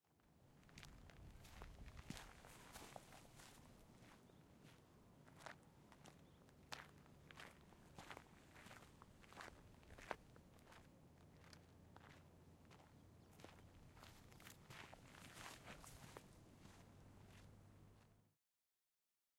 WAlking back and forth ( hence the name )